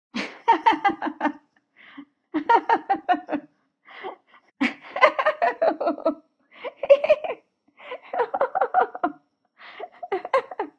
Me laughing giggling. ENJOY it!
Inspired by a wonderful man.